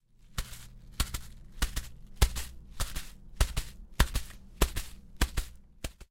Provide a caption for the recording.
Toro Corriendo
a bull running in the grass